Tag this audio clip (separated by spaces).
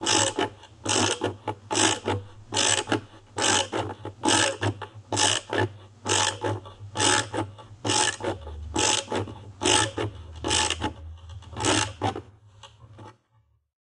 mechanical metal movie-sound screw-driver tools